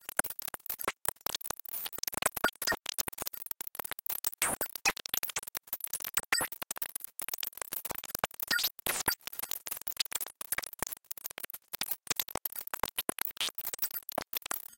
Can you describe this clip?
Cybernetic insects get hurt by the stylus of a record player from outer space. Sample generated via computer synthesis.